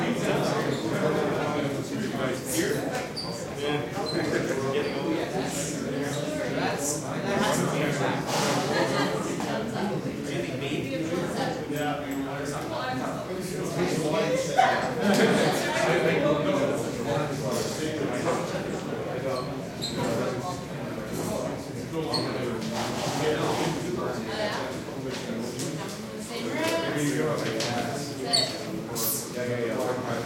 crowd int murmur bar pub comedy club roomy NYC
bar, city, club, comedy, crowd, int, murmur, new, NYC, pub, roomy, york